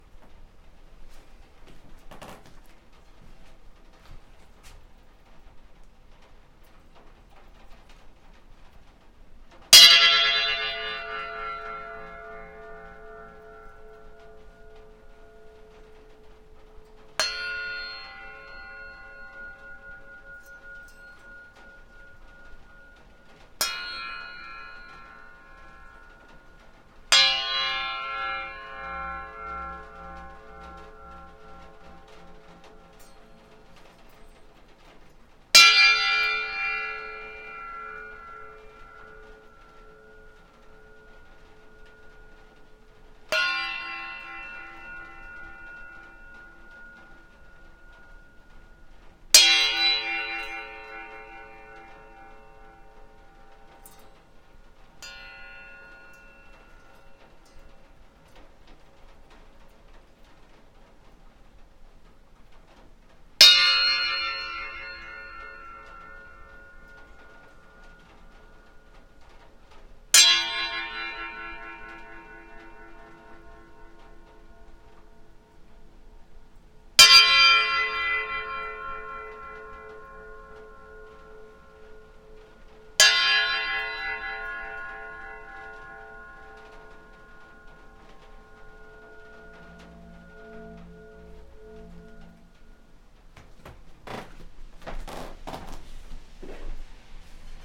A metal bar on a string.